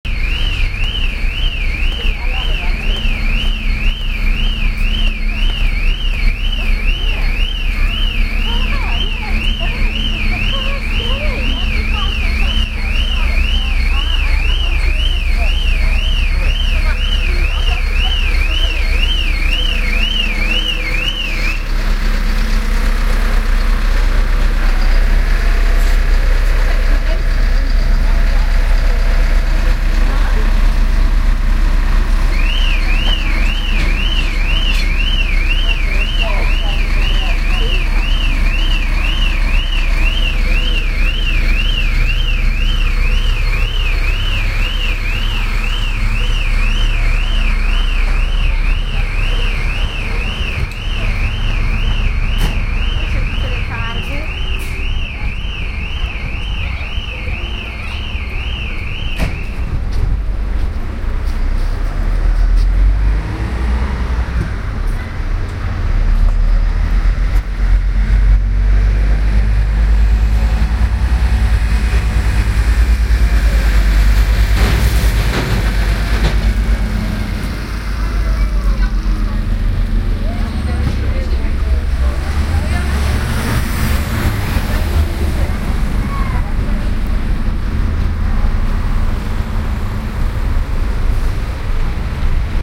Notting Hill - Car being picked up on Portabello Road
ambiance, ambience, ambient, atmosphere, background-sound, city, general-noise, soundscape